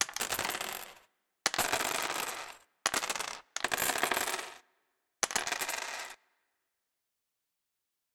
Buttons Fall on table
Buttons fall on the table. Recorded with Zoom H5. Suitable for mobile games